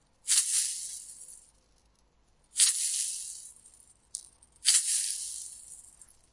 Shaker light
3 shakes of a handmade wooden rattle filled with very small beads or rice.
rattle, shaker, wood, musical, acoustic, instrument, shaman